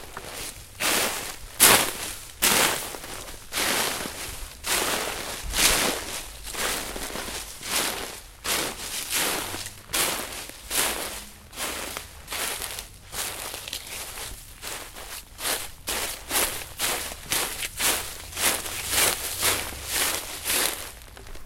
Snow and dryLeaves03
Various footsteps in snow and dryleaves